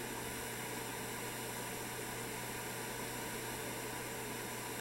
TV Light Static
TV light frequency static
static, frequency, TV